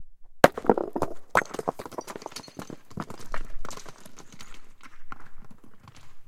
rock thrown off steep rocky cliff near Iron lakes just south of yosemite.
rocks, throw, wilderness